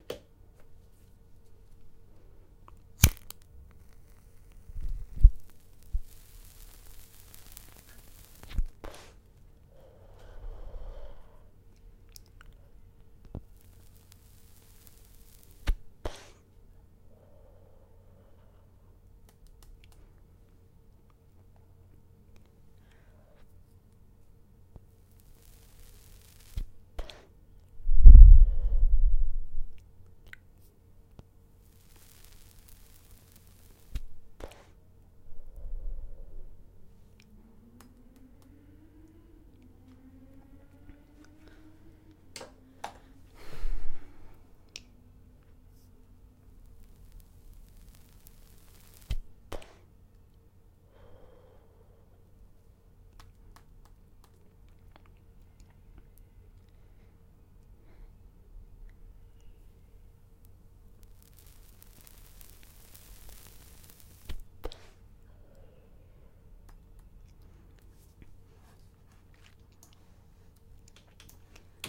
I recorded me smoking in my room (it still smells bad). Very closely mic'ed, got alot of tabacco burning sounds quite cleanly. Recorded with Sennheiser MKH8040 into focusrite saffire pro14. Enjoy!
smoking, burn, cigarette, drag, tabacco